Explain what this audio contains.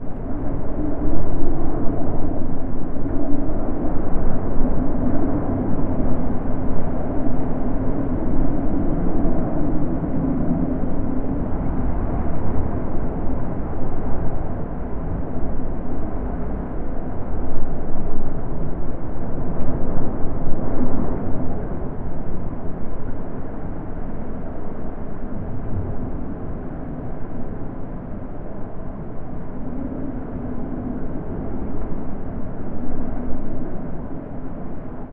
storm land
wind, howling, storm, gale, weather, windstorm, blow, windy, cold, blowing